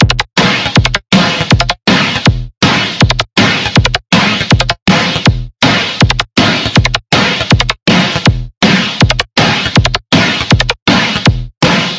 20140914 attackloop 160BPM 4 4 loop3.7
This is a loop created with the Waldorf Attack VST Drum Synth and it is a part of the 20140914_attackloop_160BPM_4/4_loop_pack. The loop was created using Cubase 7.5. Each loop is a different variation with various effects applied: Step filters, Guitar Rig 5, AmpSimulator and PSP 6.8 MultiDelay. Mastering was dons using iZotome Ozone 5. Everything is at 160 bpm and measure 4/4. Enjoy!